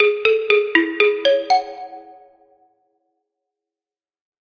A simple jingle that can be used as an announcement sound for stations or airports, inside trains or busses. Made with MuseScore2.
airport, airports, announcement, bus, busses, jingle, public, railroad, railway, sound, station, stations, train, trains, transport, transportation